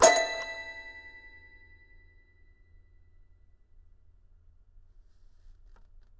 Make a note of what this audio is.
Toy records#22-E4-04
Complete Toy Piano samples. File name gives info: Toy records#02(<-number for filing)-C3(<-place on notes)-01(<-velocity 1-3...sometimes 4).
instrument, keyboard, piano, sample, samples, toy, toypiano